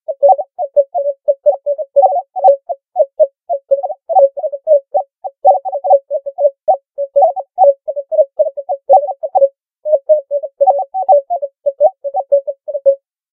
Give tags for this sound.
physics atlas experiment collider large hadron proton sonification

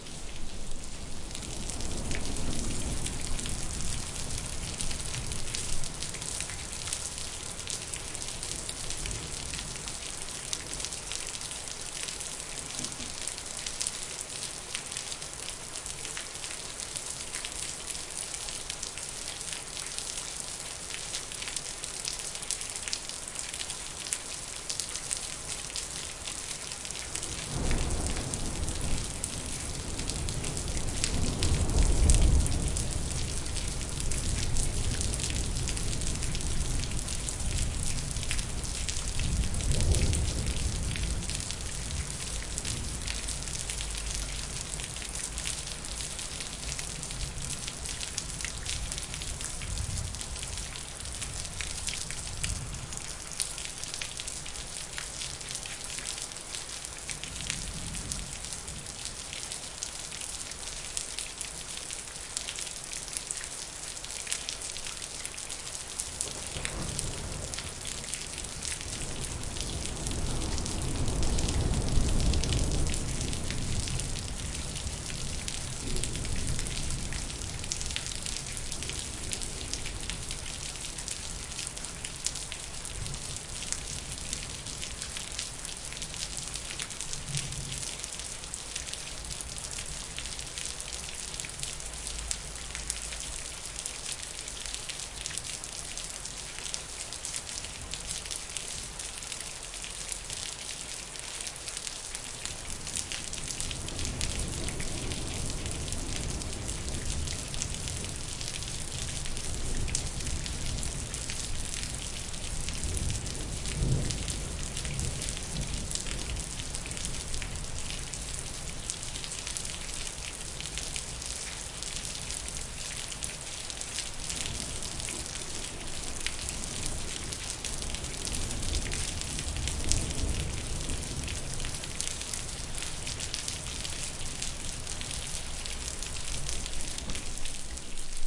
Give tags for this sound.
thunder rain hq

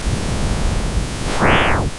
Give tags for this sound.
image2snd sound-from-photo AudioPaint Nicolas-Fournel image-to-sound photo

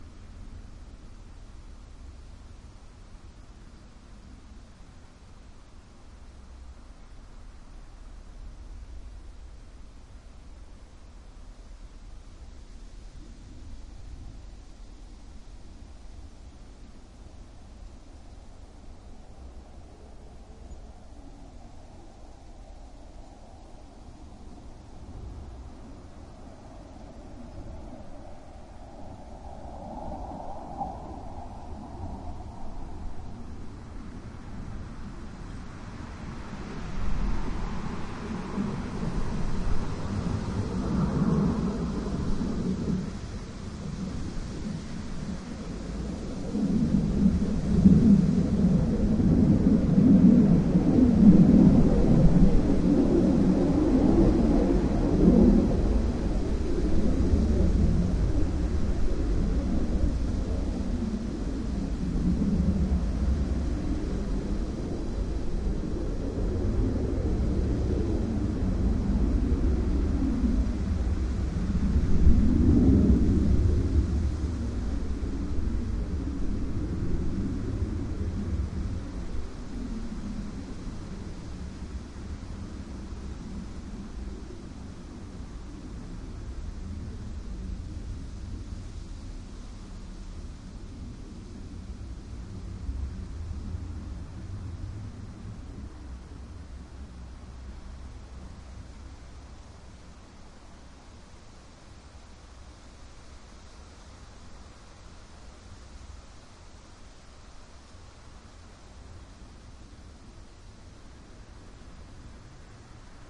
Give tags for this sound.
aero aeroplane denmark fighter fighter-jet flying jet midnight military windy

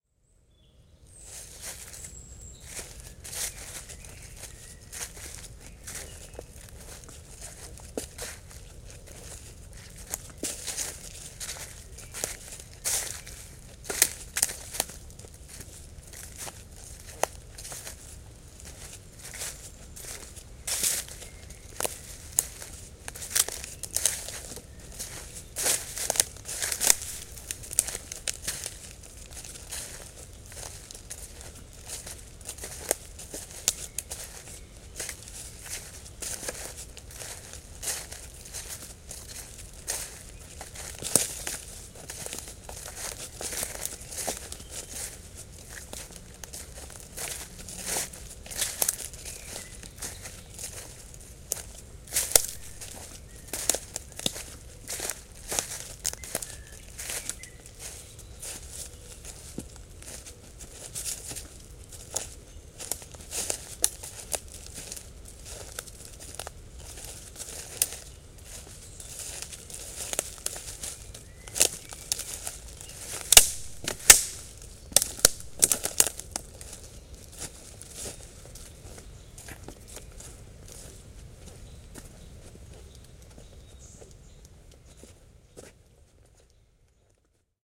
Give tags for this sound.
branches walking footsteps wood breach crack walk leaves boughs field-recording forest

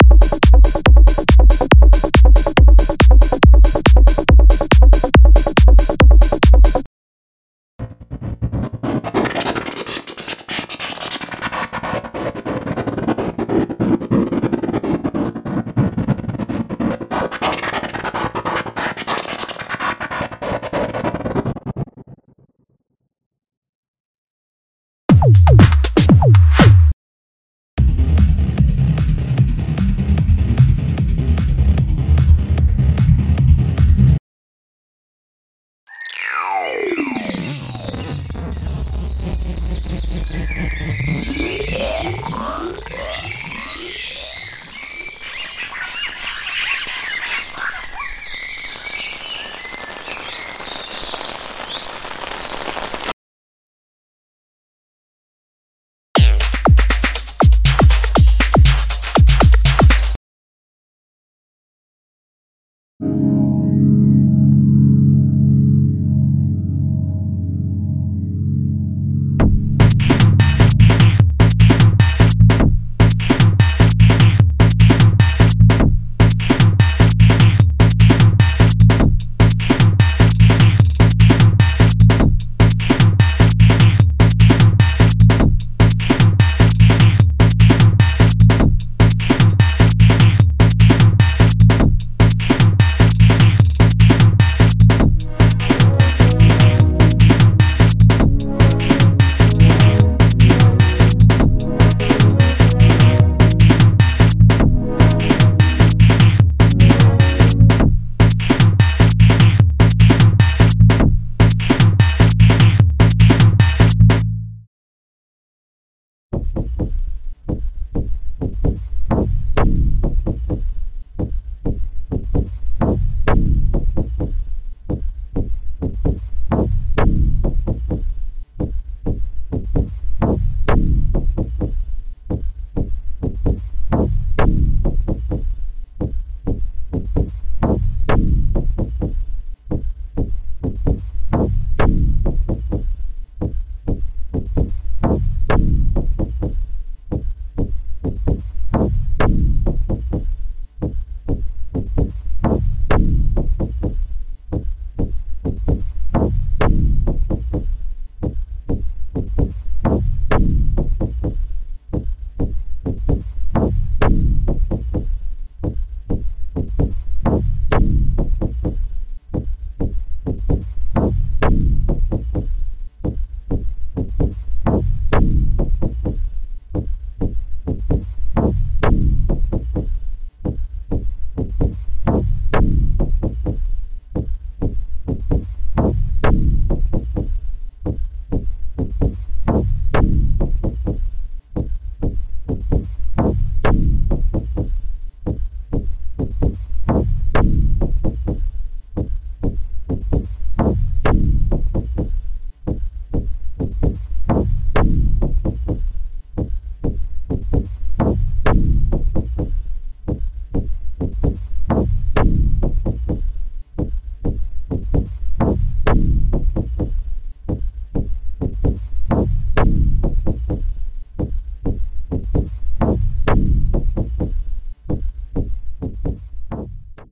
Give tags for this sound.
Dubstep Beats Techno Rap Backing Free Music Keyboards BPM Hip Rock Guitar Hop Loops Dub drums Jam EDM Bass Traxis Country House Blues Synth

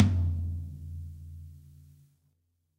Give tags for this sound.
realistic drumset drum kit tom pack middle set

